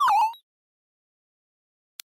A retro video game menu sound effect. Played when the player selects an option.